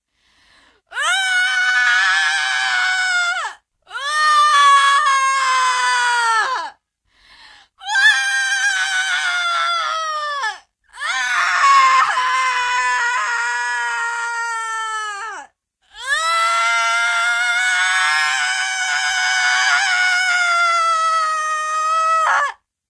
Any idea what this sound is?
Evil/dark/painful. dying scream
hurt, female